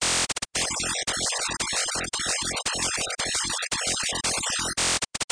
data proccessing malfunction
Data processing sound